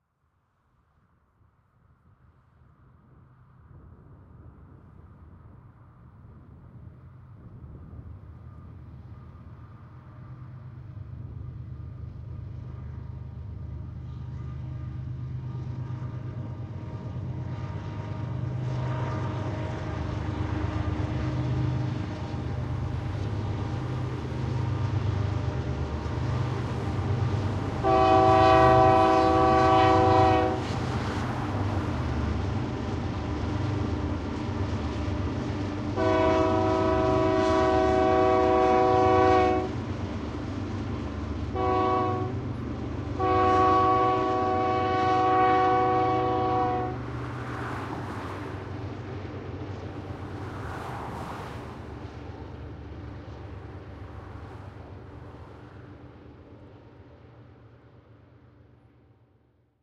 Diesel engines approaching Cajon Pass in Southern California. The train sounds its horn as it approaches a crossing. Several autos can be heard behind me as the train passes. Field recording 4/21/2012 using a Sony PCM-D50 with internal microphones and wind screen.
Crossing, Stereo, Railway, Field-Recording, Whistle, Diesel, Locomotive, Horn, Train